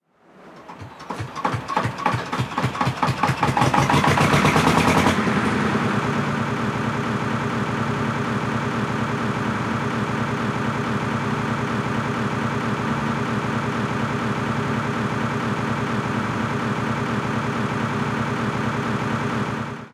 Lister Startup And Idle
A stereo field-recording of a Lister stationary engine firing and then idling as the centrifugal governor kicks in.Zoom H2 front on-board mics.
xy, field-recording, engine, stereo, machinery, diesel